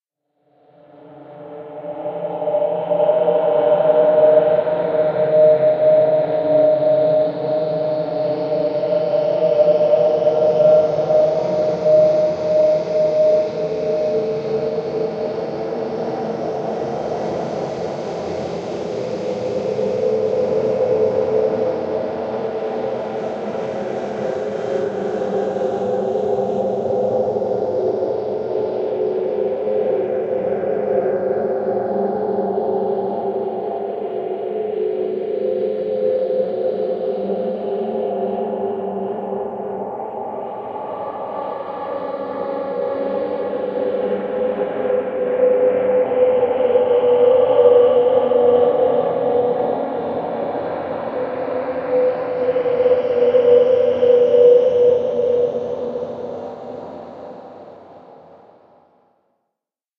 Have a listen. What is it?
Granular drone with a low-pitched base and occasional higher pitches swells.